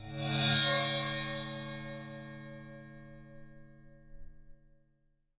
Bowed Mini China 03
Cymbal recorded with Rode NT 5 Mics in the Studio. Editing with REAPER.
beat, bell, bowed, china, crash, cymbal, cymbals, drum, drums, groove, hit, meinl, metal, one-shot, paiste, percussion, ride, sabian, sample, sound, special, splash, zildjian